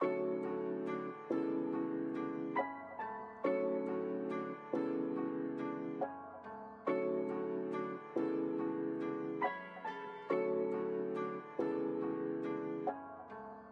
Live & Love | Piano Sample Loop | BPM: 140
loop, filler, listening, short, short-music, piano, melodic, tik-tok, melody, rap, music, composition, soundscape, lead, underscore, hip-hop, background-music